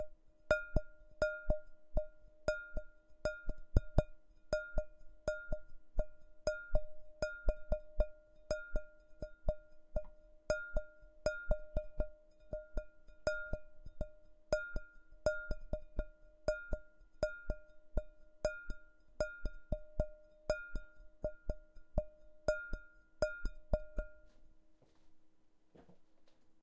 A simple bright bowl played at 120bmp with the fingertips.